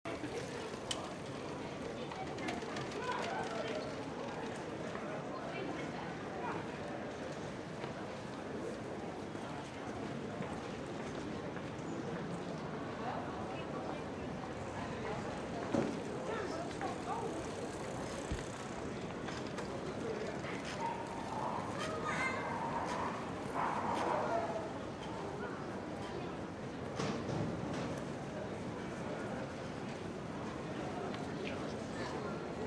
General noise market (wider perspective) bike passing, dog barking.
design, Market, ambient